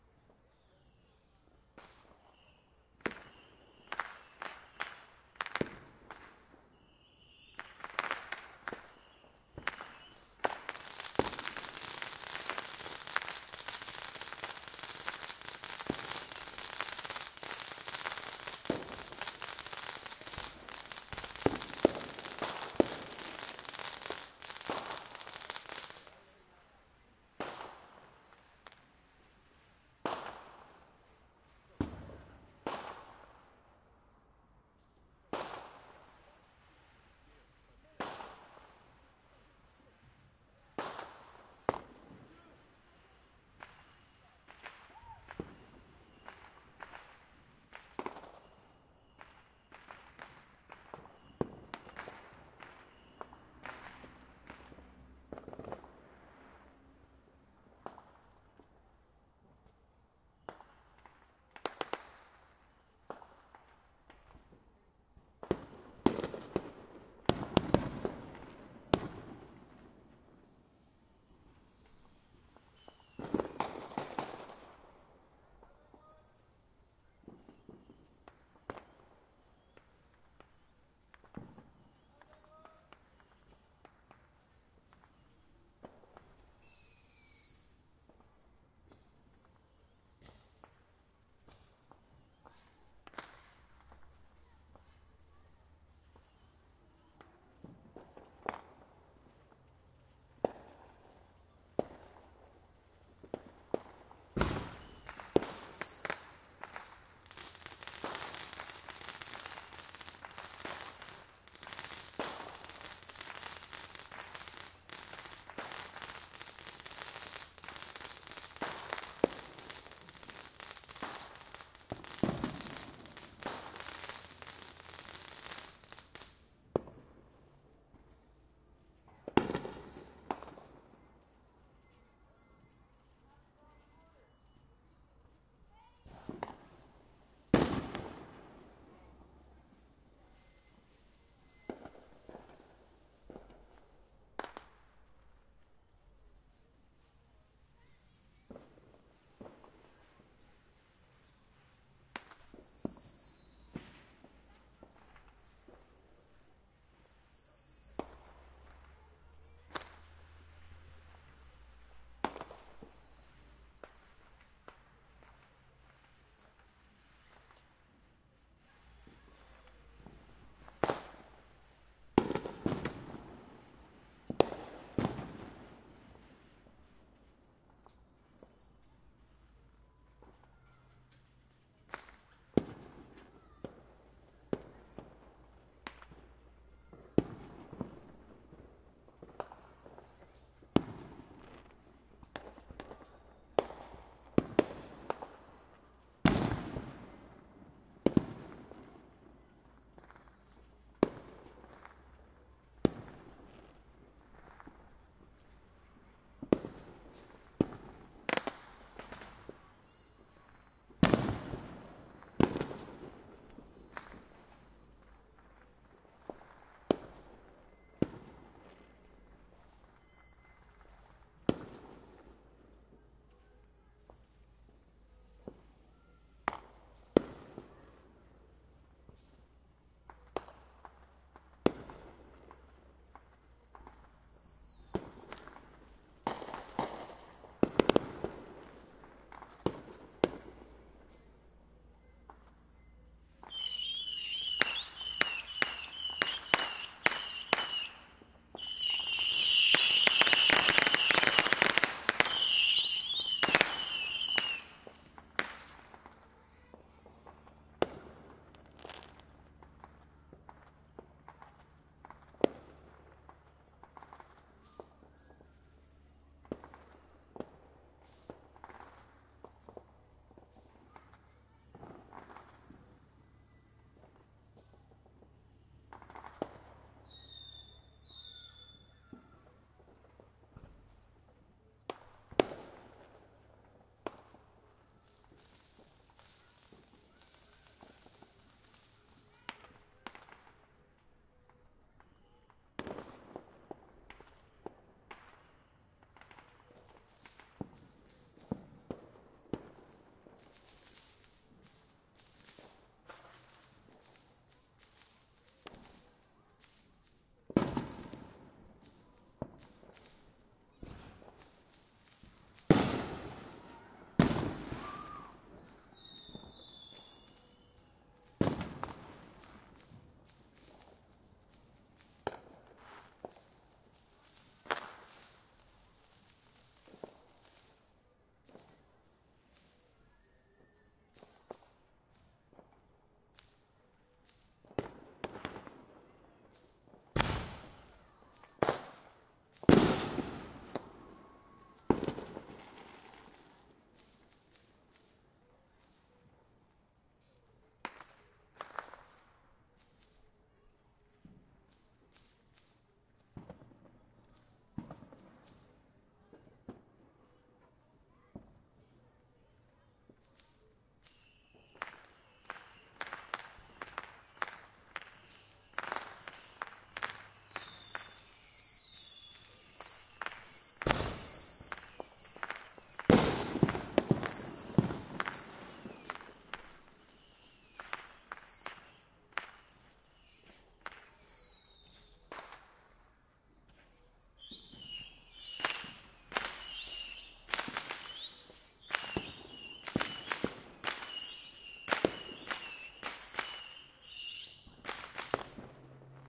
My neighborhood on July 4, 2012 (Independence Day, US). More crackly fountain sparklers and whistlers in this one, but still lots of bottle-rockets and mortars.